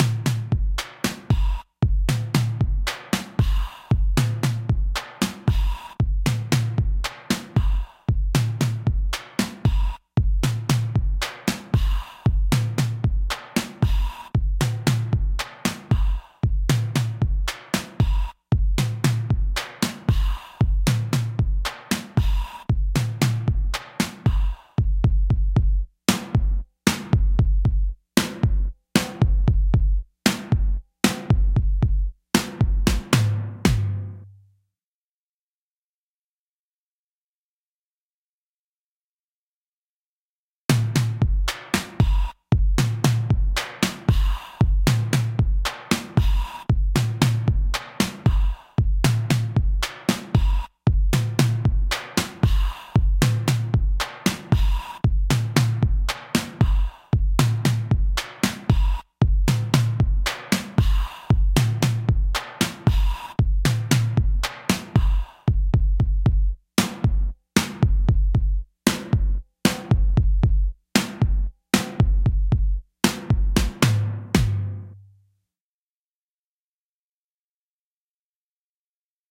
Time of the season Parte A
Drum Part A of "Time of the Season" made with my trusty elektron machinedrum uw
Drums, Electronic, elektron